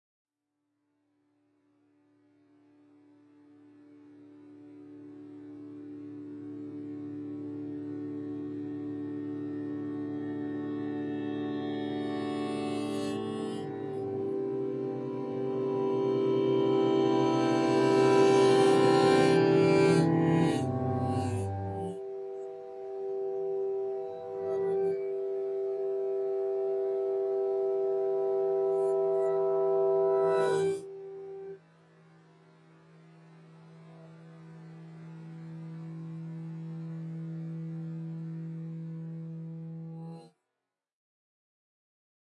Contrasting Major and Minor Tones
This sound clip contains different major tones and minor tones be played at the same time in a non melodic or rhythmic pattern. However, no tone is being played in both speakers. There is always a different tone being played in one speaker than the other in order to increase the contrast between tones. These tones were achieved through recording different chords being played on a guitar, then all were slowed down and some put in reverse. HEADPHONES ARE RECOMMENDED.